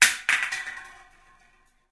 Can rolling
beer, can, empty, rolling, thrown